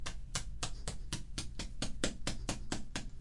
83. Golpes contínuos
hit, hittin, sounds